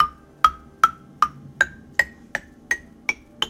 Sound from xylophone All notes